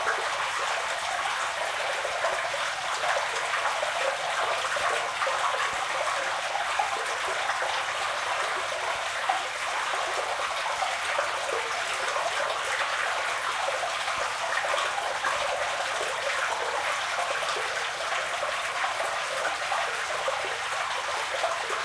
Water in Sewer 3
This is a Medium to Heavy Mid perspective point of view or water running through a storm drain. Location Recording with a Edirol R09 and a Sony ECS MS 907 Stereo Microphone.
sewer, water-running, storm-drain, water